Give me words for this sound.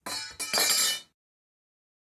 Varillas Cayendo s
Metal, metalic, stuff